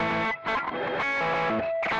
Randomly played, spliced and quantized guitar track.
120bpm, buzz, distortion, gtr, guitar, loop, overdrive